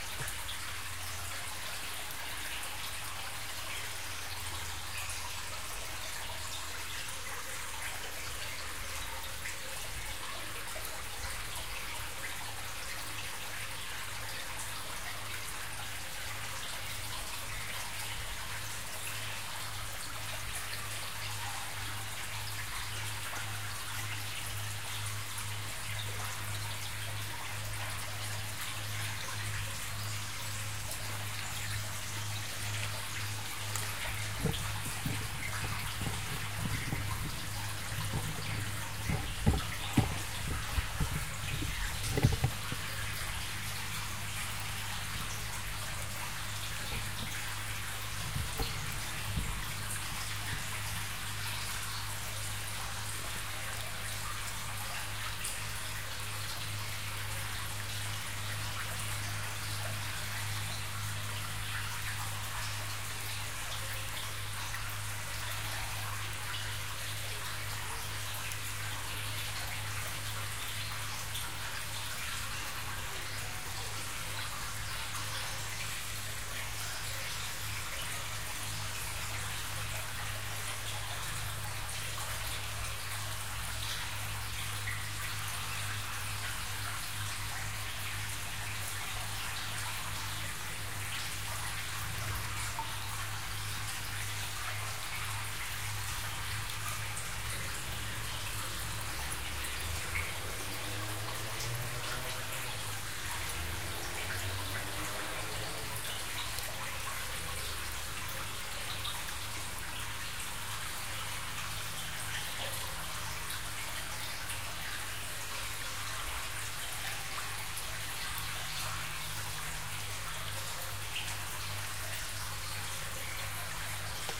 water through a drainage pipe